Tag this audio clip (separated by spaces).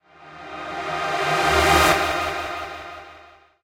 wavosaur trance rave reaper effect electronic made project uplift sound used club recorded reverb delay ping-pong house pan panning dance ahhhhhhh my synth1